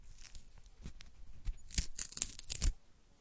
A very dry (not surprisingly) ripping sound. I tear beef jerky very close to two condenser mics. These were recorded for an experiment that is supposed to make apparent the noise inherent in mics and preamps. You can hear the difference in noise levels from the mics, as is one channel the noise is clearly louder.